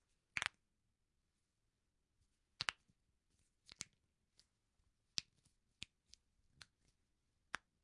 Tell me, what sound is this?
Popping Knuckles
Popping my knuckles. It sounds worse than it feels.
crack, cracking, knuckles, pop, popping, snap